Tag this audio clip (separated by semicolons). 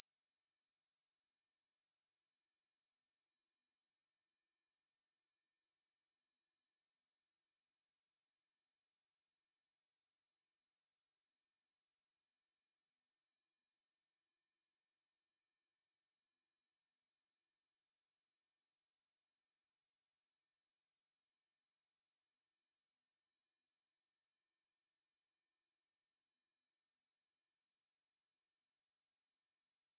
generator; frequency; ringing